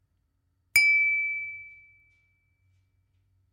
This is the sound of toasting champagne glasses clinking recorded with a TLM 103 through a Scarlet Solo using a dbx 286s on an iMac.
camdenMIDIDAWI, new-stuff, jfeliz